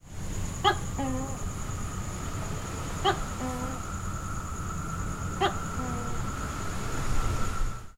Tokeh on a quiet beach
A very silent (and therefore maximized - turn it down for your purposes again!) recording of a distant Tokeh at Haad Chao Phao beach, Koh Phangan, Thailand recorded with an Olympus LS-11.
beach, gecko, Tokeh